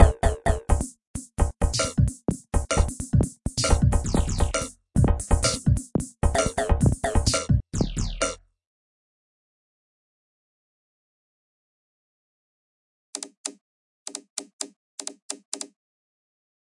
Nord keys Dirty
Nord Lead 2 - 2nd Dump
ambient, backdrop, background, bass, bleep, blip, dirty, electro, glitch, idm, melody, nord, resonant, rythm, soundscape, tonal